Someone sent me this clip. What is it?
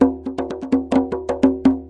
tambour djembe in french, recording for training rhythmic sample base music.
djembe, loop